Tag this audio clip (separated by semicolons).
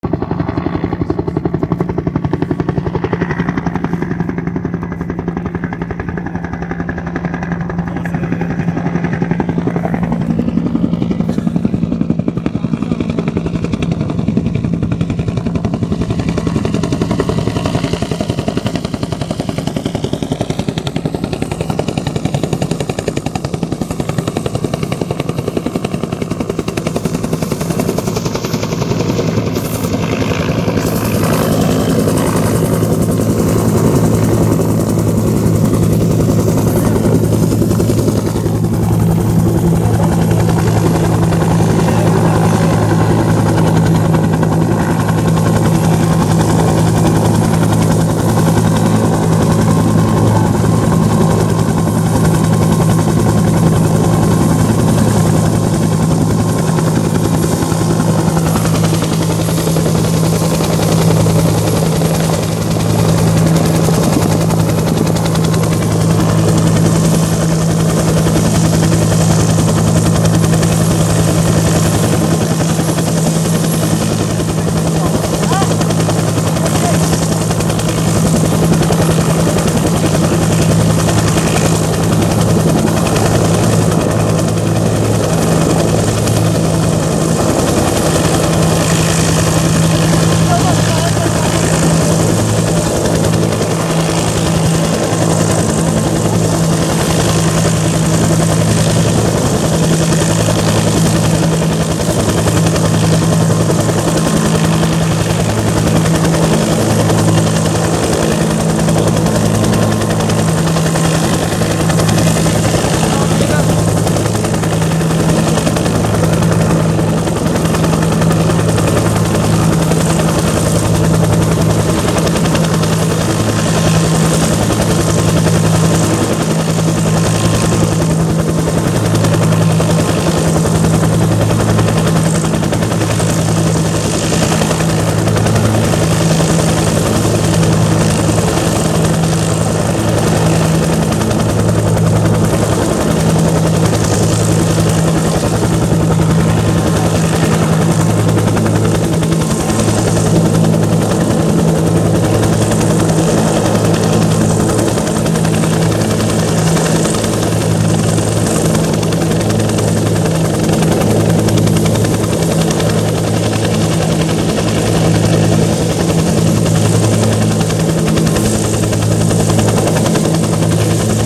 copter; wind